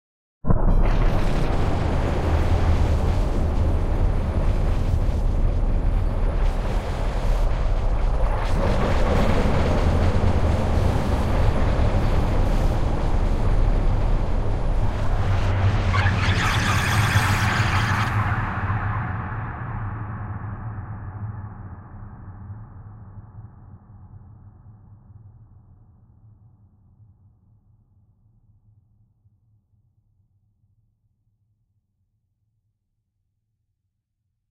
sci-fi dark pad
Guitar being routed through multiple chains of fx.
Pitchshifters, delays, reverbs and compression.
atmospheres, effect, experimental, pad, scary